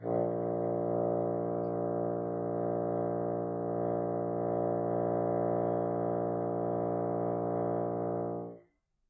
One-shot from Versilian Studios Chamber Orchestra 2: Community Edition sampling project.
Instrument family: Woodwinds
Instrument: Bassoon
Articulation: sustain
Note: A#1
Midi note: 34
Midi velocity (center): 31
Microphone: 2x Rode NT1-A
Performer: P. Sauter